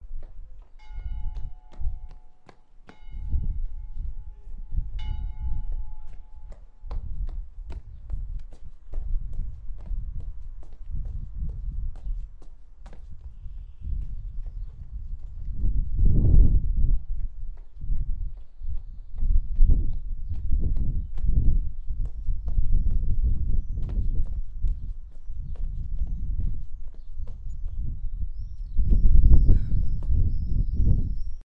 20200501 Exercici matinal al terrat - Ejercicio matinal en la azotea
Ejercicio matinal en la azotea último día de confinamiento duro
Dispositius/Dispositivos/Equipment: ZOOM H6
Autor/Author: Ariadna Pujol
field-recording, soundscape